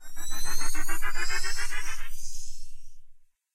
Custom Lex Matrix FX - Nova Sound
Kick, Custom, Rhythm, NovaSound, House, Lex, XBass, Loop, Dru, Propellerheads, Kit, FX